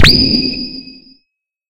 A sword schwing sound made using synth.
Anime, Fantasy, Magic, Power, Sword